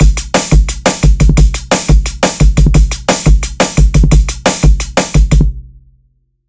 semiQ dnb dr 001
This is part of a dnb drums mini pack all drums have been processed and will suite different syles of his genre.
beat, break, breakbeat, dnb, drum, drum-loop, drums, groovy, jungle, loop